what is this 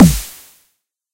Same as the Punchy Soft Snare (Short), except with more white noise at the tail.